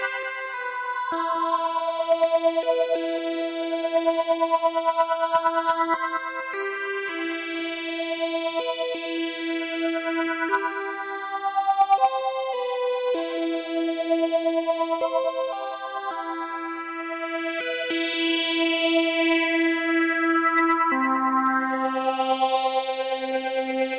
Korg A31 HighLead 002
Melodic high synth part recorded from a MicroKorg with the cutoff tweaked but otherwise unprocessed. The sound pans a lot and has a thin dry quality.